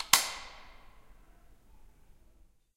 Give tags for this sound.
echo hi switch

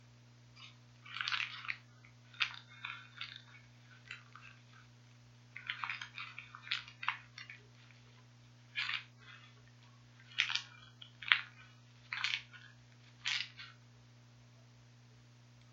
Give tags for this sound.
mordisco naufrago toruga

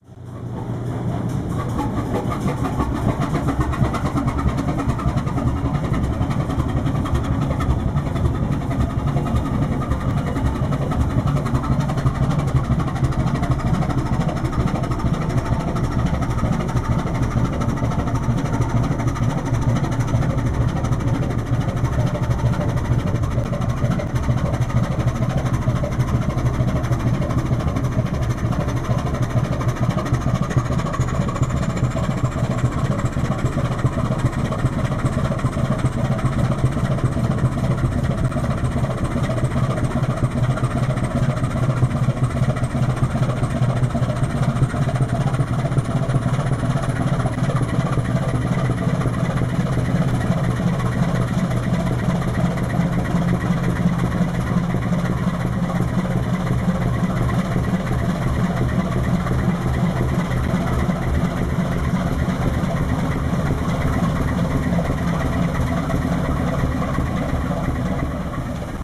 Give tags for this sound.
diesel carrier cargo barge shipping ship accelerating engine riverliner vessel Deutz

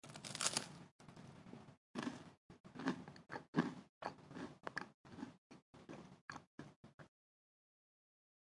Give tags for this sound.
crunch eating food

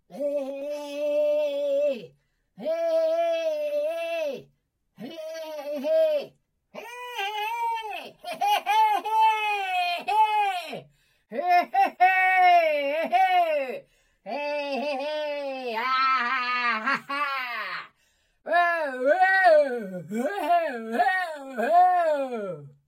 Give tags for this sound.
chatter cheer cheering gnome goblin gollum hiss imp indistinct language supporting

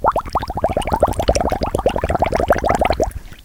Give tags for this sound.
bubbles water

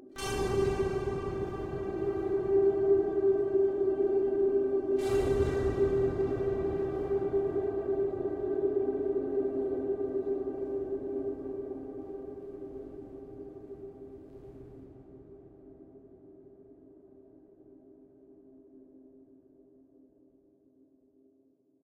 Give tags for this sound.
space pad multisample drone artificial soundscape

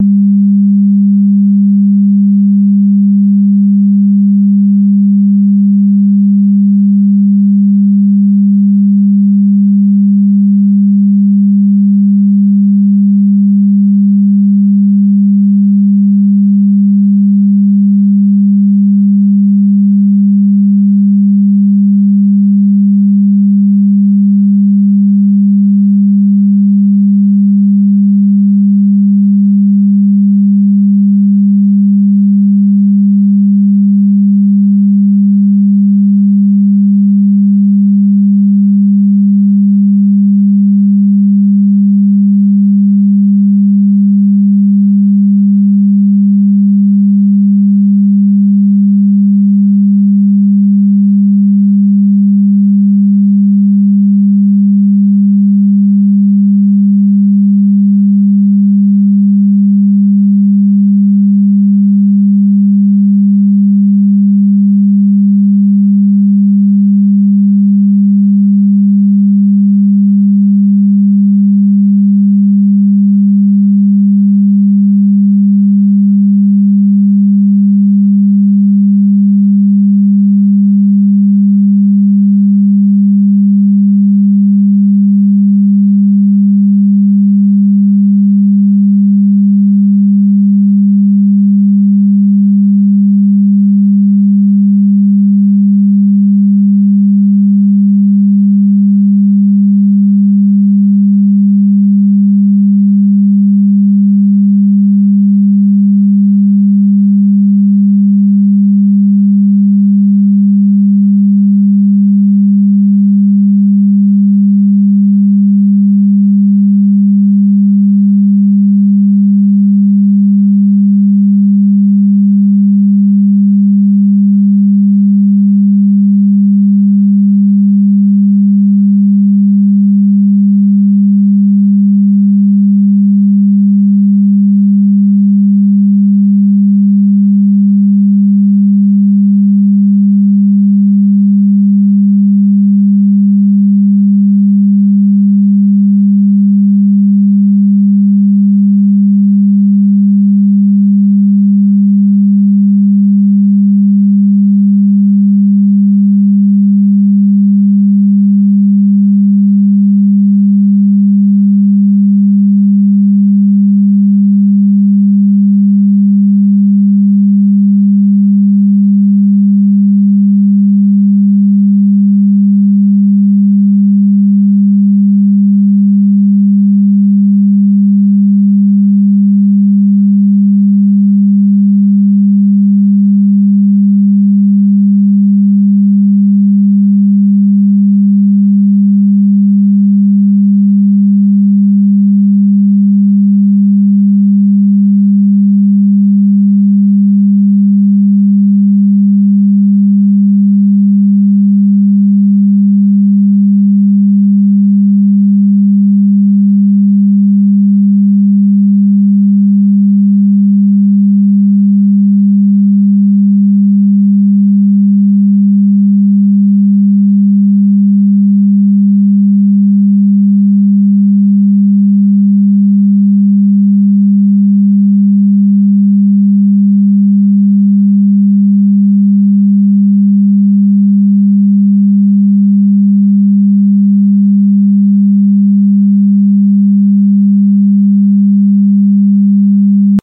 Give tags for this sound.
electric sound synthetic

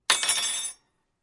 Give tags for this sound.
clatter fall hit silverware

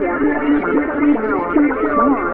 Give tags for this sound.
110bpm ts-404 electronica dance resonant electro loop acid trance

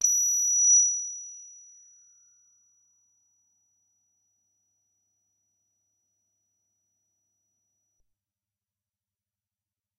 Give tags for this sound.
analogue cs80 ddrm midi-note-122 midi-velocity-16 multisample single-note synth

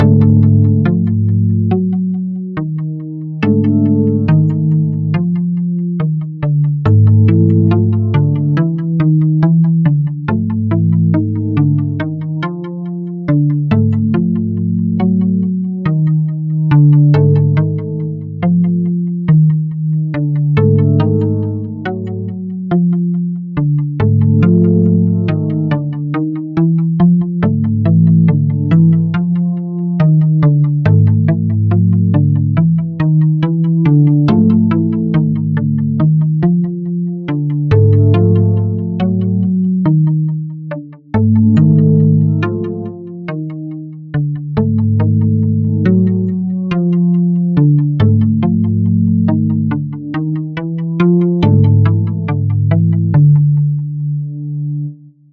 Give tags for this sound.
Ambient Dark Game Horror

